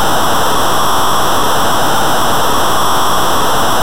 Distorted interferences
Accidentally made in SunVox.